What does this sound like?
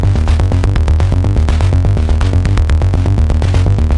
Drones and sequences made by using DSI Tetra and Marantz recorder.
Analog, Sequencer